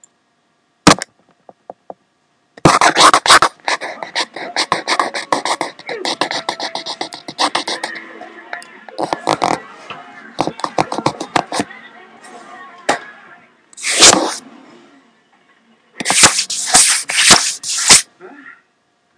Noises I made
noise, weird, strange